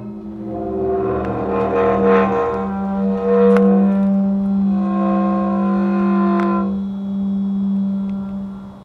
Heavy wrought-iron cemetery gate opening. Short sample of the groaning sound of the hinges as the gate is moved. Field recording which has been processed (trimmed and normalized). There is some background noise.
creak, gate